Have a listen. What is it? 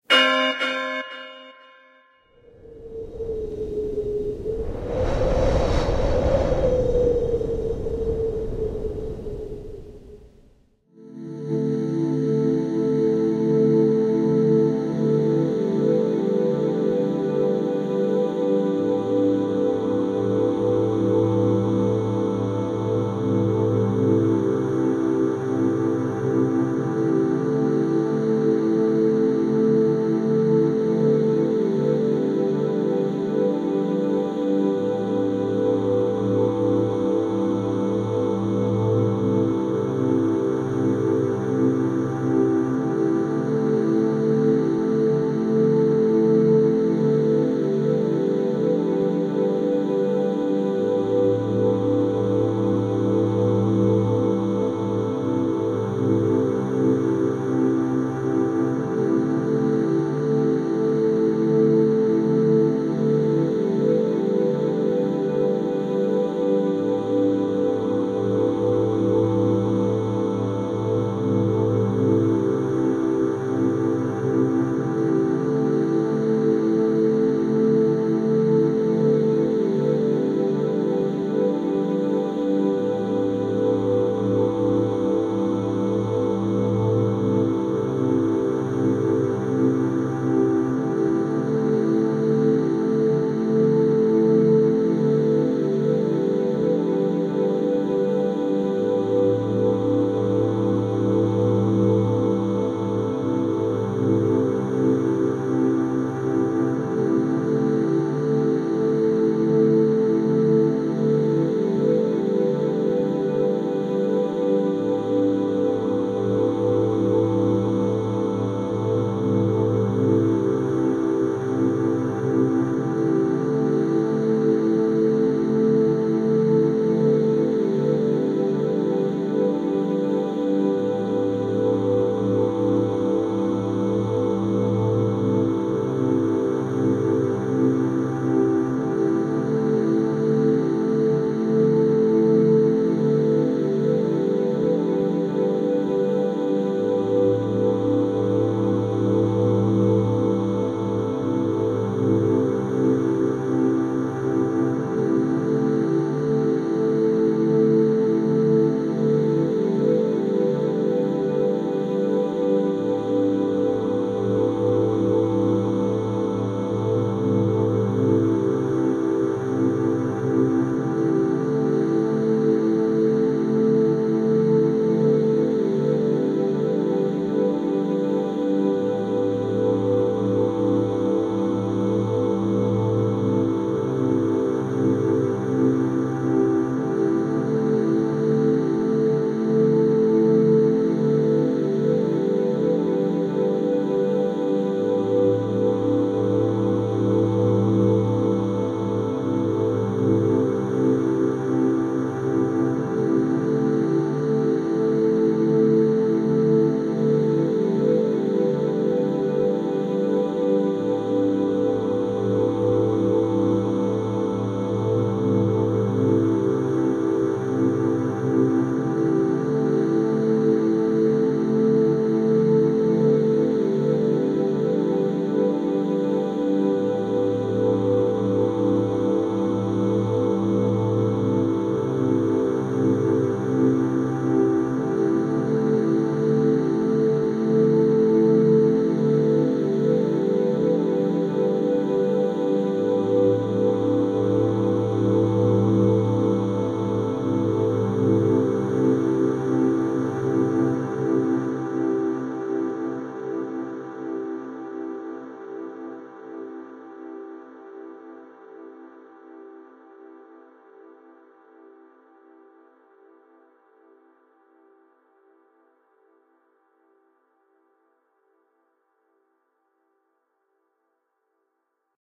Residential School Underscore
A sound cue I designed with a Euphoria Synth Pad from Garageband on my MacBook Pro. Track used to underscore a powerful scene between 2 residential school survivors for the theatre production called 'Nicimos: The Last Rez Christmas Story'. The play ran from Nov. 24 to Dec. 19, 2014.